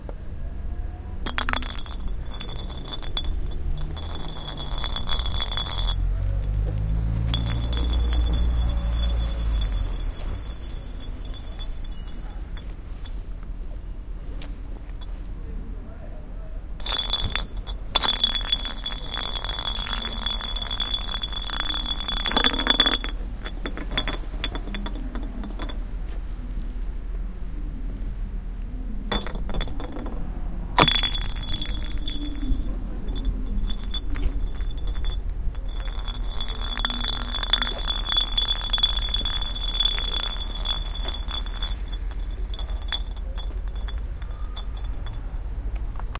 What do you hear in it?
bouteille roule01
The sound of a plastic bottle rolling on a garden table ... recorder with R09.
bottle, roll, glass